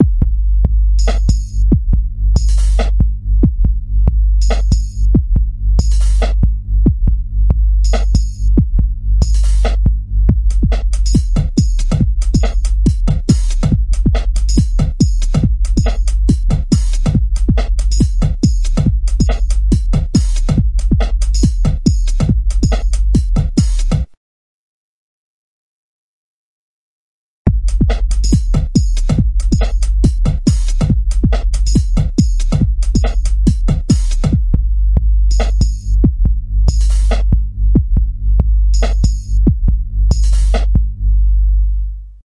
valine2 2-drums
full, vili, mix